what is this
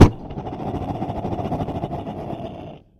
Gas furnace is ignited and starts to burn and sounds sad.